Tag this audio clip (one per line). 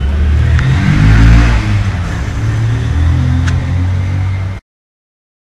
sound
motor
motorcycle
machine